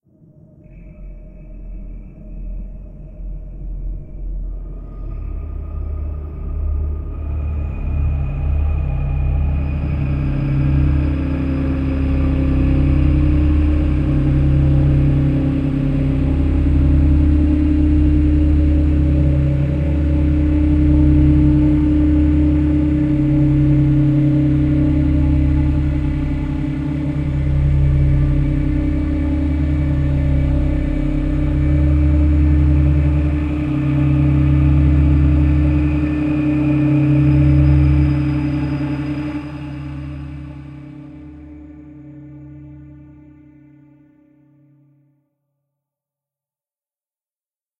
Horror ambiance created using Kontakt Player